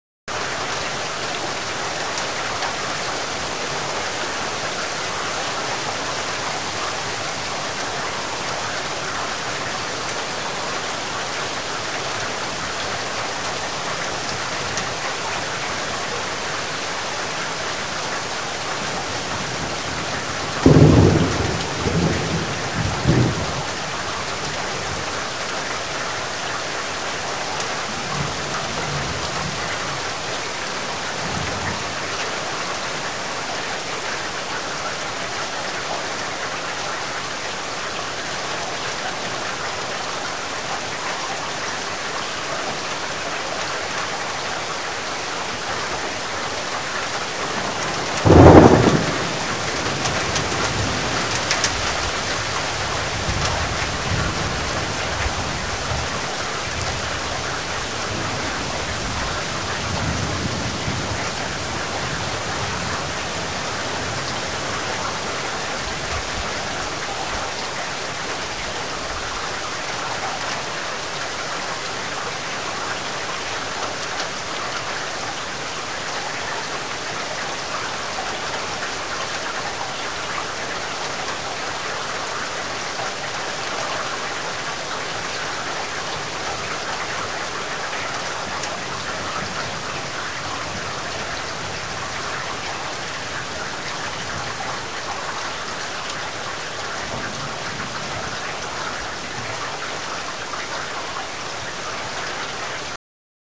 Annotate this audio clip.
Heavy Rain,
recorded with a AKG C1000S
rolling-thunder, raining, nature, water, weather, thunder, heavy, lightning, ambient, ambience, drip, rainstorm, thunderstorm, field-recording, rain, strike, wind, thunder-storm, storm, shower